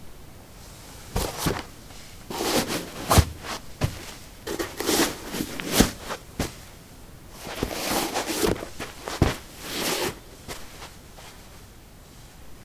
carpet 15d darkshoes onoff
Getting dark shoes on/off. Recorded with a ZOOM H2 in a basement of a house, normalized with Audacity.
steps, footsteps, footstep